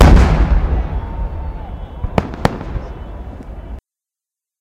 hit loud with distant whoa
recording of a firework explosion with some distant 'whoa' shouting